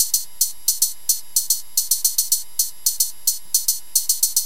hihat line done with a mam adx-1. played by a midisequence by a mam sq-16.